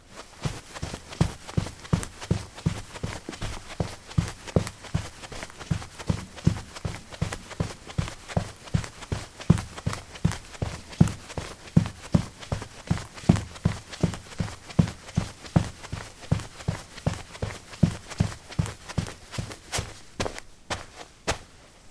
carpet 16c trekkingshoes run
Running on carpet: trekking shoes. Recorded with a ZOOM H2 in a basement of a house, normalized with Audacity.
footstep, footsteps, steps